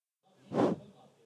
dhunhero bigwoosh1
This was pretty hard to do and didn't give the result I expected.
I didn't blow into the microphone, and rather, I just "fanned" the microphone several times for Audacity to recognize the "wooshing" sound. It kinda hurt my hands a bit too.